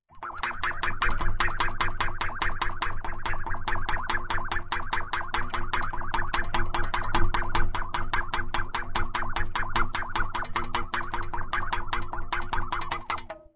Saw that I swing forth and back.